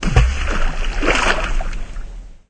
splash sw3
The splash of a stone falling into water.